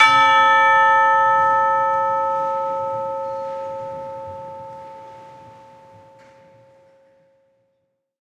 In this case we have managed to minimize audience spill. The mic was a Josephson e22 through a Millennia Media HV-3D preamp whilst the ambient partials were captured with two Josephson C617s through an NPNG preamp. Recorded to an Alesis HD24 then downloaded into Pro Tools. Final edit and processing in Cool Edit Pro.
tubular, josephson, media, united, canada, church, ring, e22, millennia, live, third, audio, ringing, pulsworks, npng, metal, avenue, saskatoon, alesis, c617, percussion, saskatchewan, arts, chime, bell, hanging, chiming, orchestral